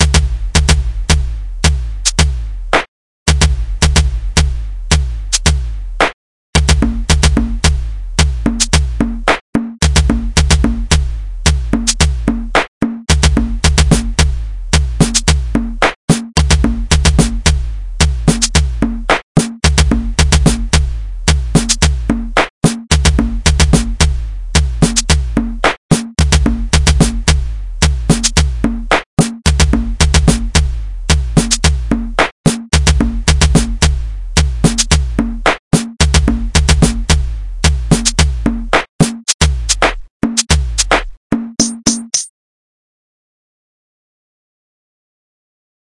Loop using Linn drum samples created in LMMS.